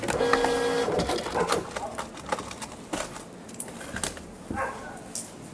Recorded with Tascam DR-44WL on 19 Nov 2019 Berlin
Supermarket checkout cash register till receipt printout and change